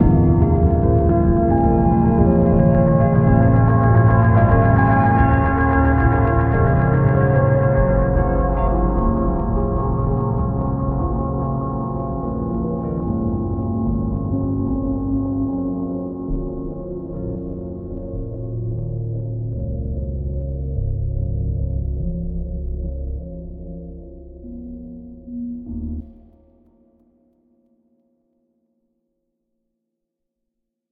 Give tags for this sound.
music
fx
UI
effect
stab

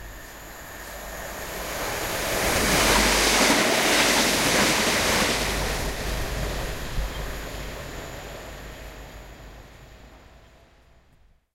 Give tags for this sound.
electric
locomotive
passing
railway
railway-train
train

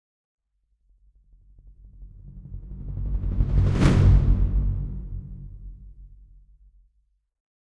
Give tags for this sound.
Big-Drum-Transition
Big-drum
Big-drum-hit

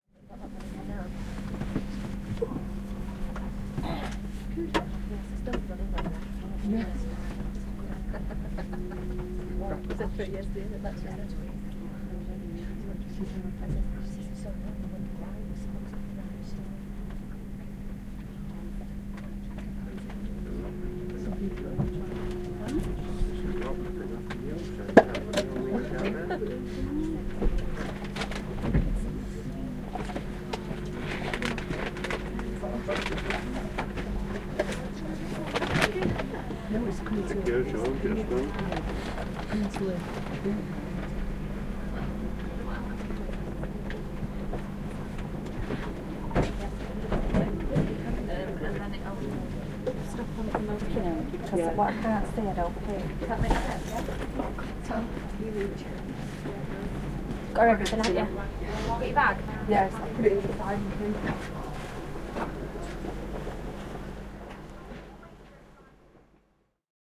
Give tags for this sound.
ambience
voice
field-recording
train
atmosphere

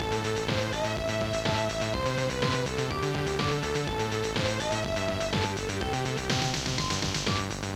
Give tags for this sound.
Amiga
Amiga500
bass
cassette
chrome
collab-2
Loop
Sony
synth
tape

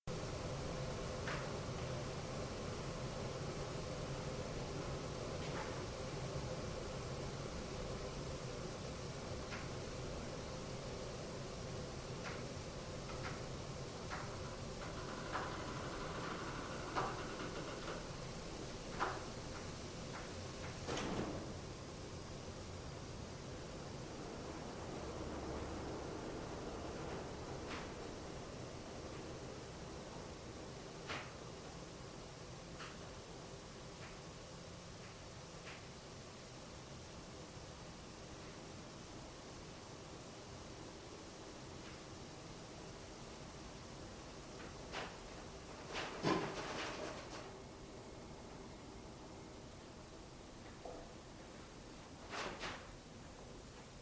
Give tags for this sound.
Church
Electric
Off
Organ
Pipe
Pipes
Turned

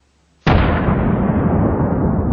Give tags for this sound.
slam
crash
boom

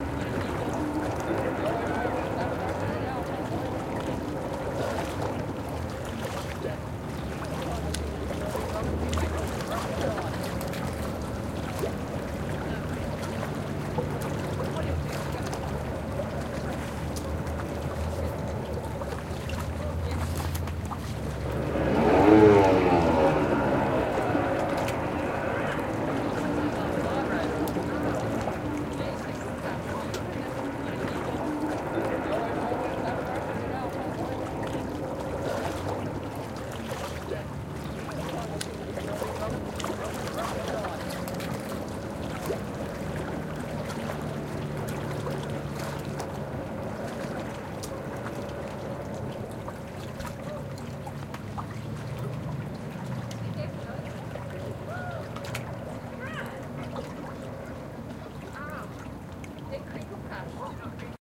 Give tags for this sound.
water
recording
sea
field-recording
field
stereo
boat

